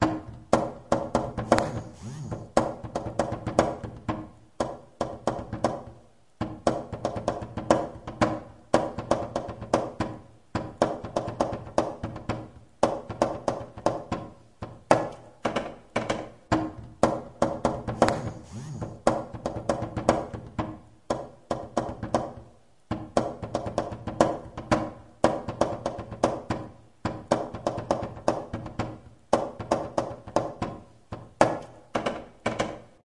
Before centrifuging
Washing drum machine: Otsein Hoover LTOH 87 A
Sticks: None. Just my right hand.
Recorder: Mobile phone LG L3 Optimus
PC software: Cool Edit Pro 2.0
Editing: Cut-Copy-Loop Duplicate
Effects: Graphic Equalizer-Present drum+Reverb-Warm Room
Enjoy!
home-recording, their, everyone, ERMTMS, right, mobile-recording, music, make, has, syndicate